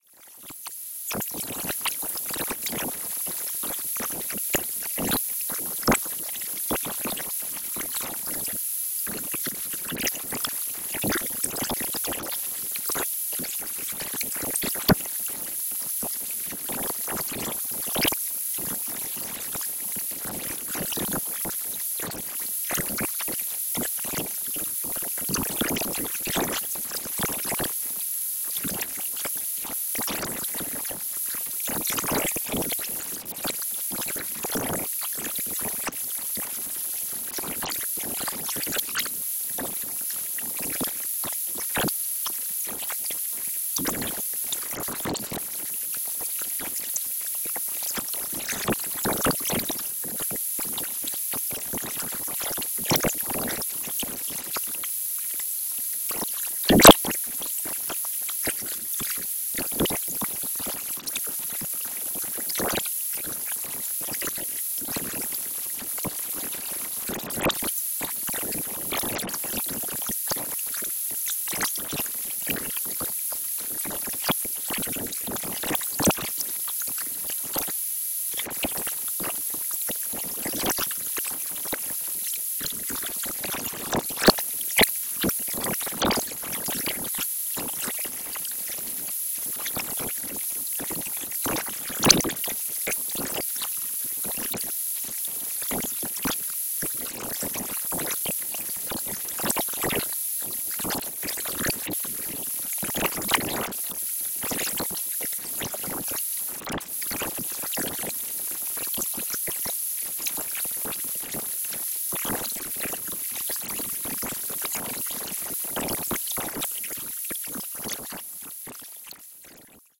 This sample is part of the “Wind” sample pack. Created using Reaktor from Native Instruments. This is some weird noise resembling water, but yet completely different.
soundscape, drone